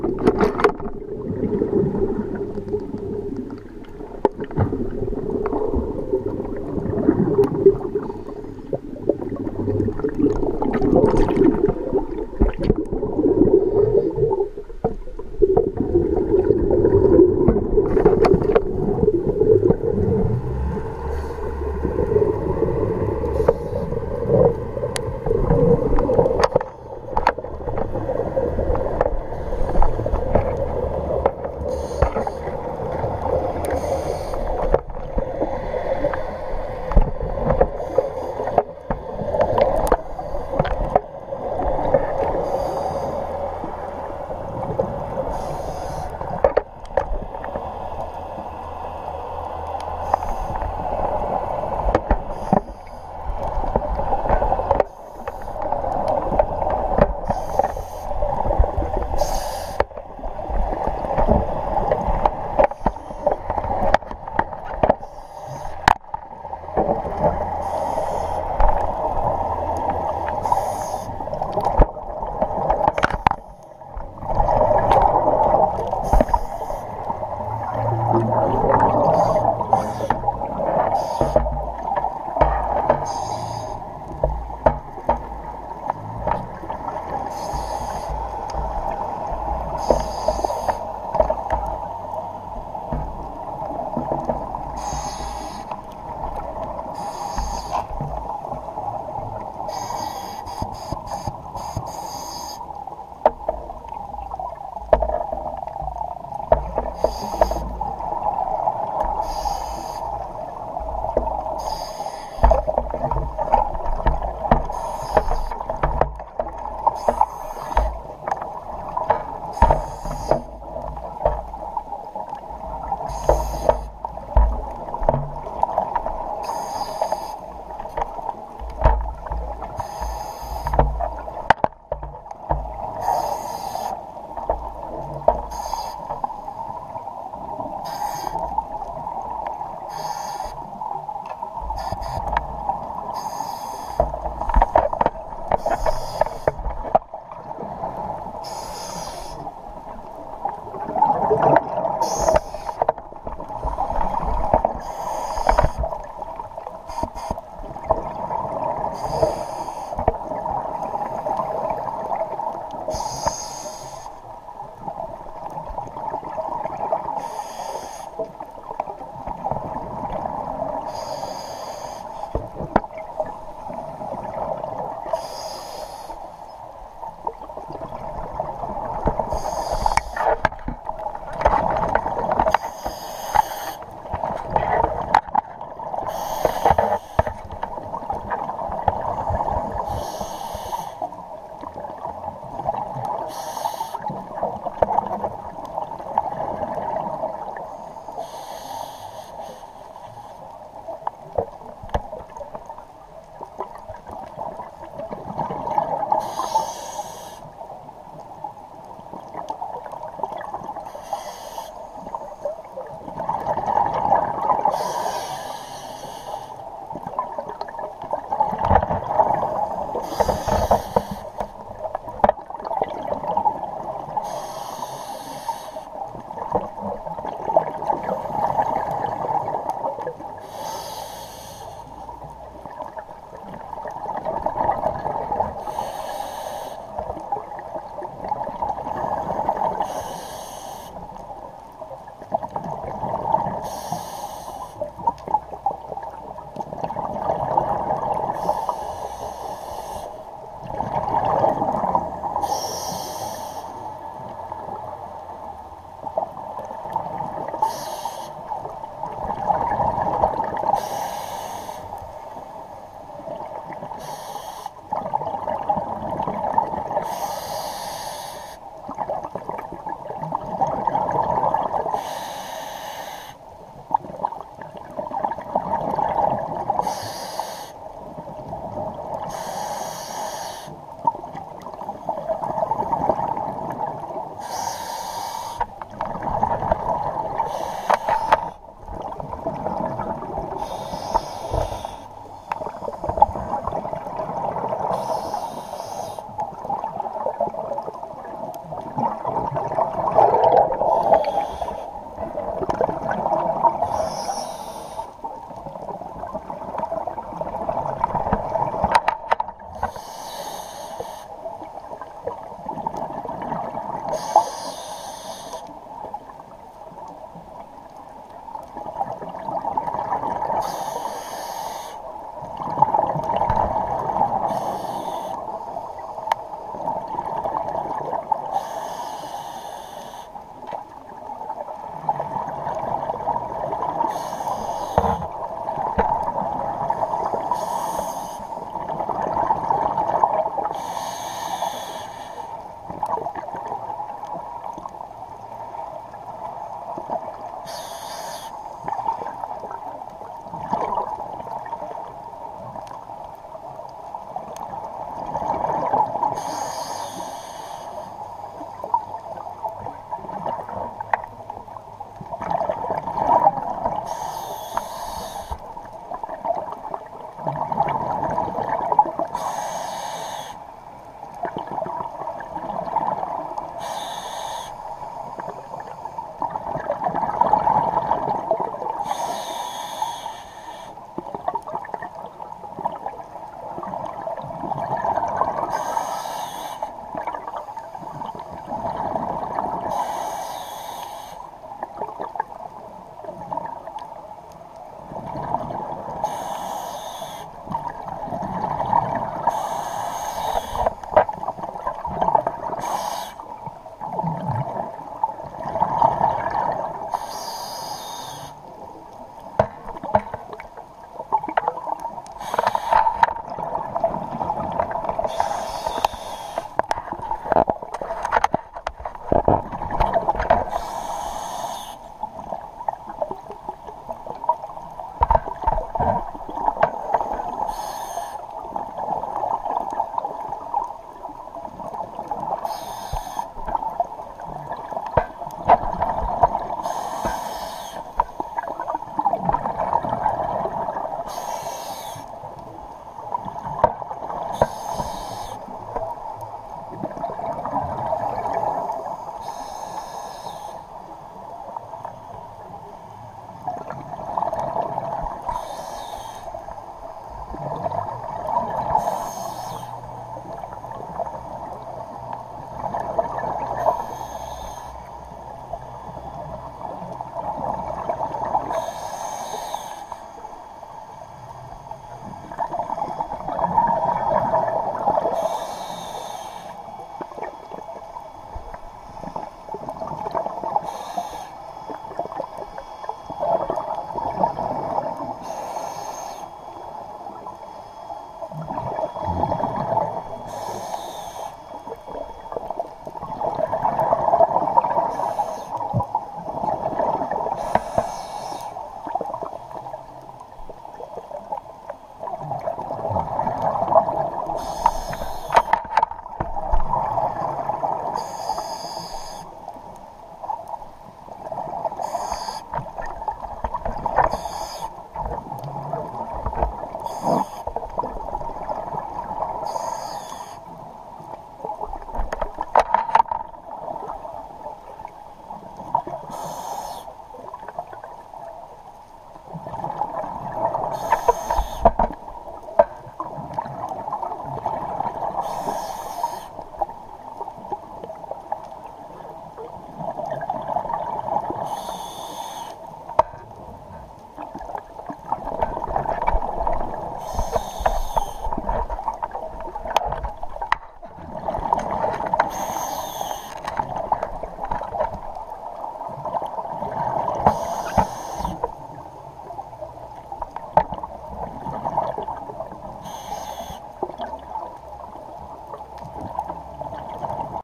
Recorded with gopro Hero 3+ black inside 3D housing. Scuba diving audio with an inexpensive regulator (that's the wheeze), occasional clicks are the selfie stick rotating. Diving at about 20 meters deep, off Florida coast in the Atlantic.